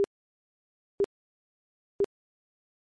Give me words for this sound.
400hz bleeps @-20dBFS 3 Seconds
BLEEPS, LINE, UP